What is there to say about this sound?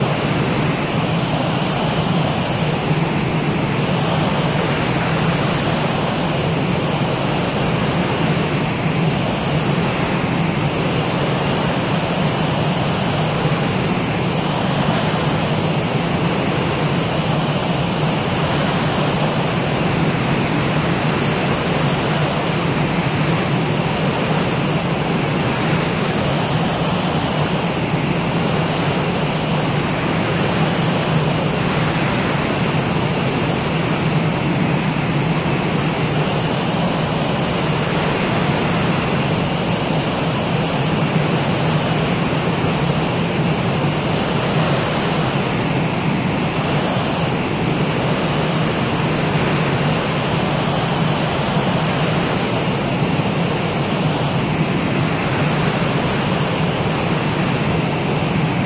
Aggressive sample of heavy wind and rain by the sea.
Made with max/msp.
ocean, storm, sea, rain, wind